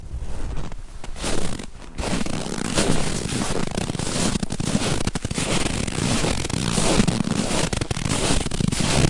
fotsteg på hård snö 1
Footsteps in hard snow. Recorded with Zoom H4.